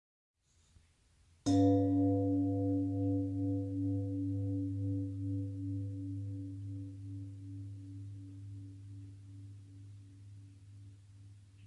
knock pot cover 1 lightly
test my recorder by cellphone and pot cover